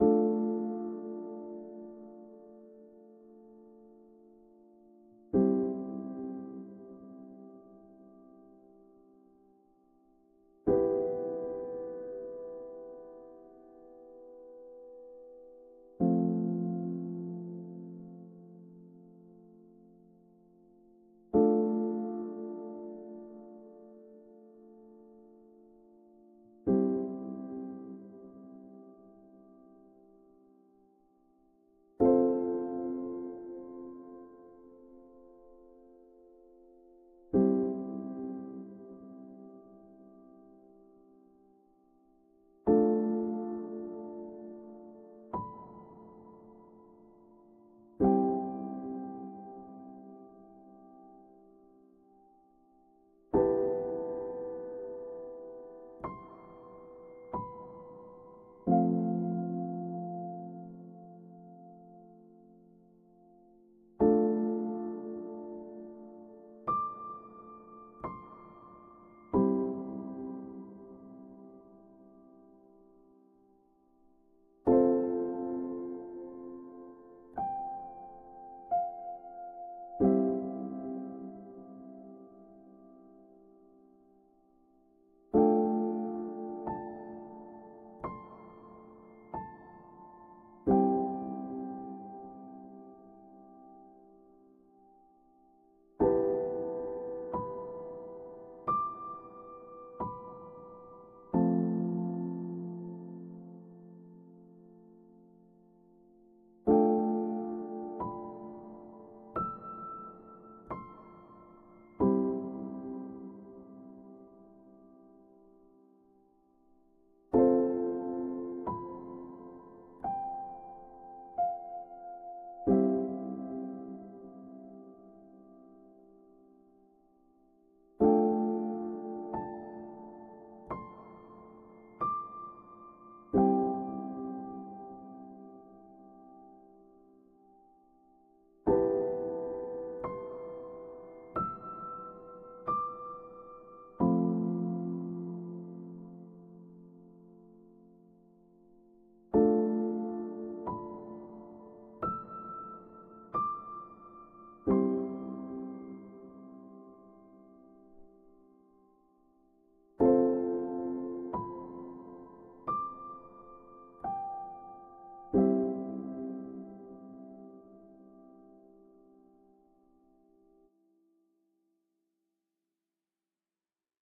Sad piano music for a variety of projects.
Made with FL studio 21.
--------------------------------------WARNING-----------------------------------------
You are allowed to: Share/edit/remix/use/integrate this song in any project as long as the appropriate credits are given or if the project remains private. Failing to comply will result in your project, any type, being taken down.